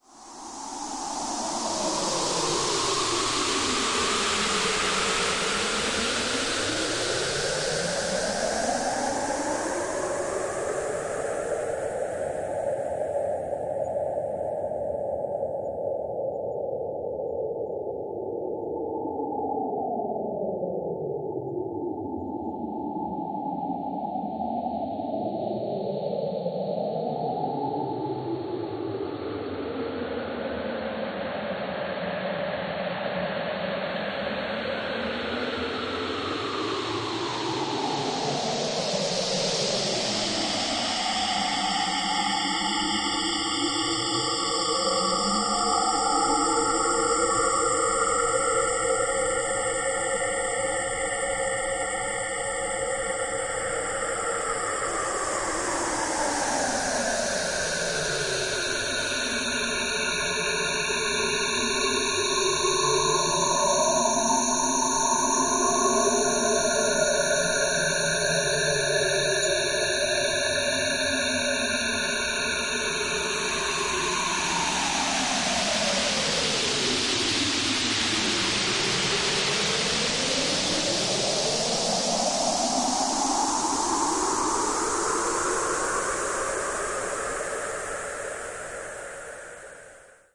windy sound generated by synthesis and spectral processing.I used white noise as the basic sound and EQ, flanger and fft based processing.
air, arctic, noise, synthetic-wind, wind